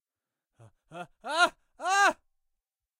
scream1 serles jordi
scream, screaming, horror, scary, 666moviescreams